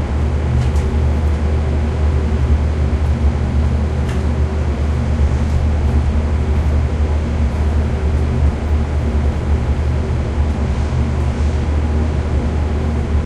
Sounds recorded while creating impulse responses with the DS-40.
field-recording, ambiance